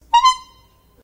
My first upload. It's a clown horn being used at an office. Great for all clown lovers and haters! Recorded with wireless Sennheiser Lav onto Canon C300.
Clown, honk, horn